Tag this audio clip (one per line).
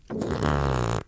cartoon
Diarrhea
fart
farting
flatulence
gas
poo
poot